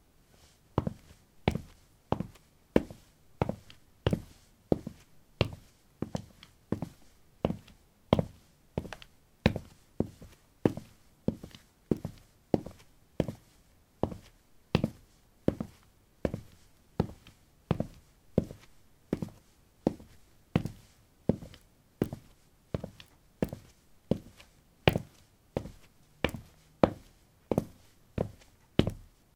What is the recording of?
paving 16a trekkingshoes walk
Walking on pavement tiles: trekking shoes. Recorded with a ZOOM H2 in a basement of a house: a wooden container filled with earth onto which three larger paving slabs were placed. Normalized with Audacity.
step, walking